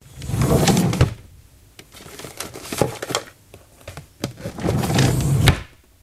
Drawer open & close
A sound effect of a draw opening and closing